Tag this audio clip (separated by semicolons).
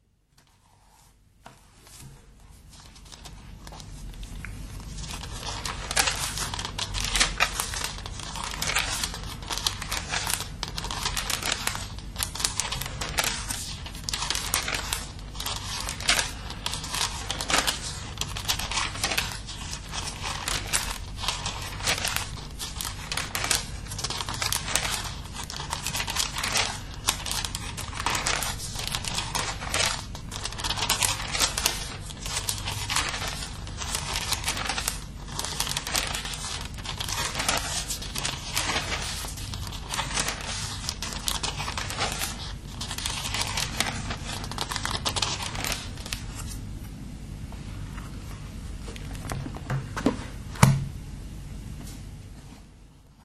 book; paper